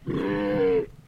Monster Bellow 6
fantasy, creature